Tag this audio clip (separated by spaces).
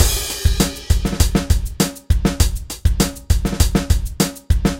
100 percussion beat loop metal jazz brake bpm drum rock real